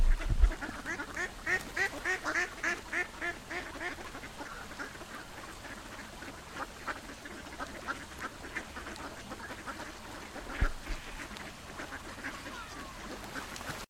these are the sounds of background ducks in a pond quacking and making splashing sounds.
pong, ducks, splashing